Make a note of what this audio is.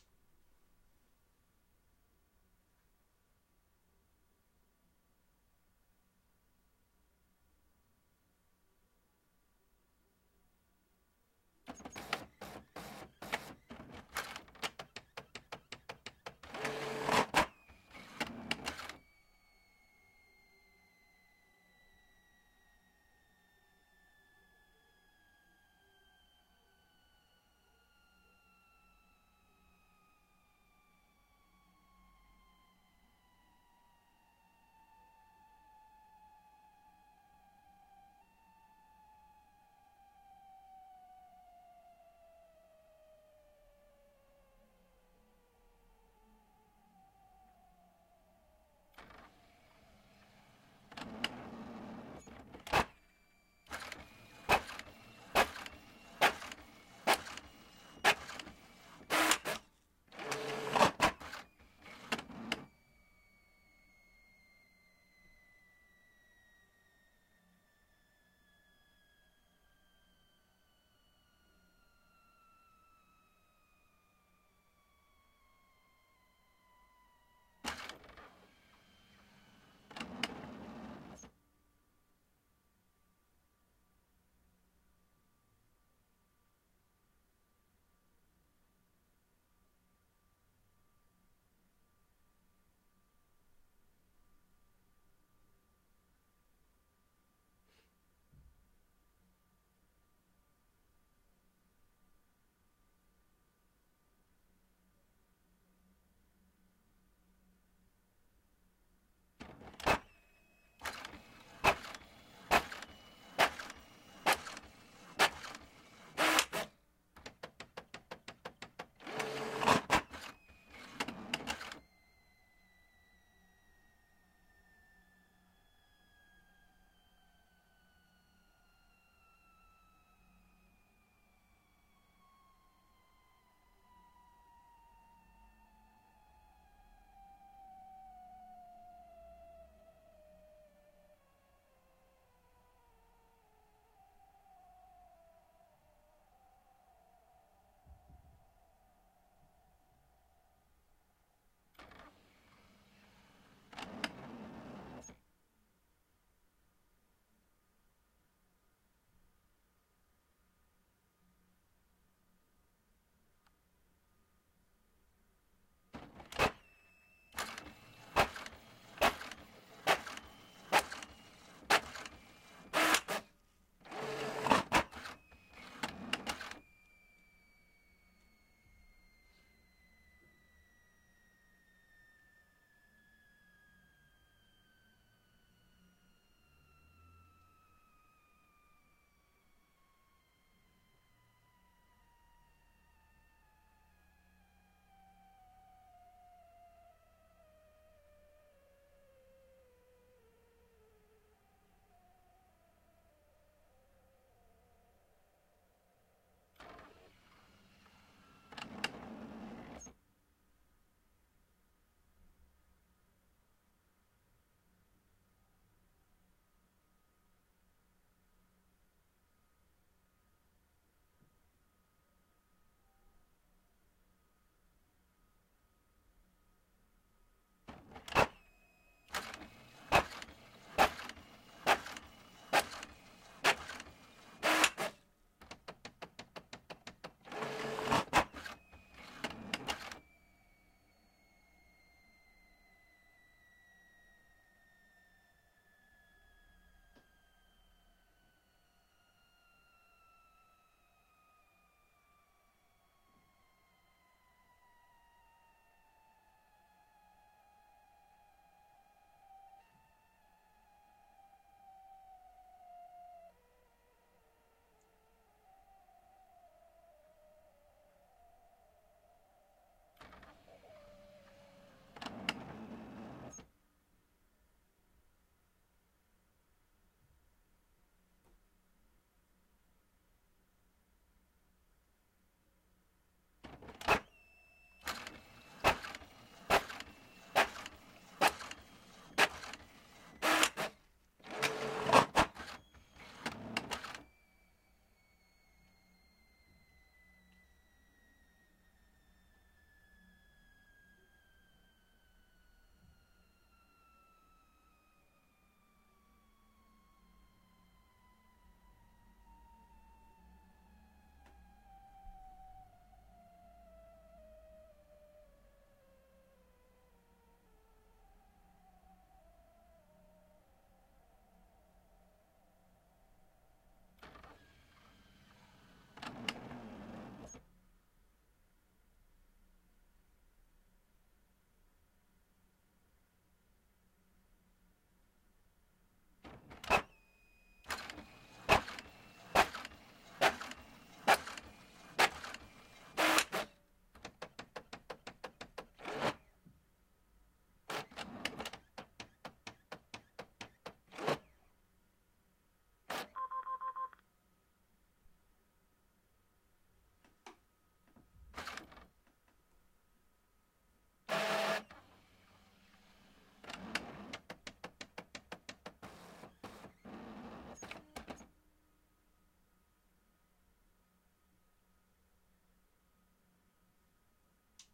printer close
Mono x 2
shotgun Sennheiser ME66 + K6
Zoom H4n
Printer - Brother DCP-J41100W
AKG, photocopier